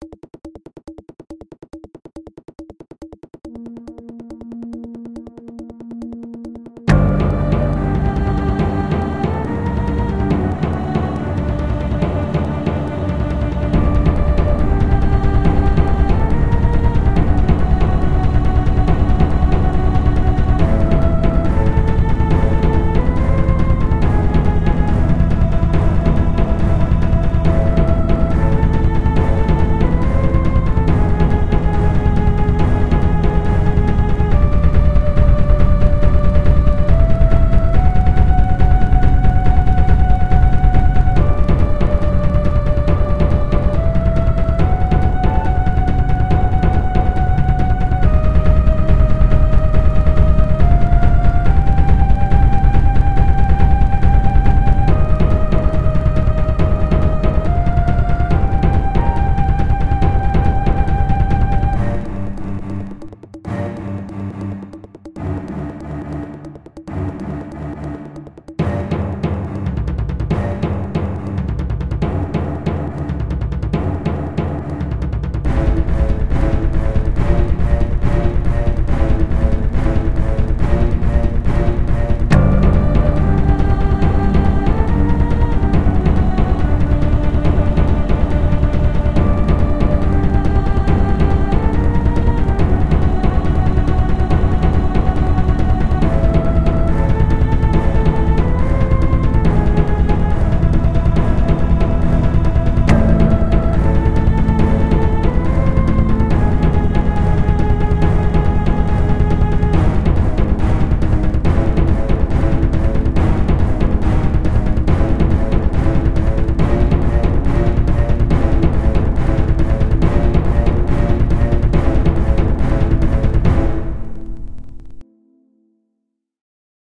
Countdown (Cinematic Music)
Made 100% on LMMS Studio.
Instruments:
Strings
Drums
Bass
Choir
Flute
Pad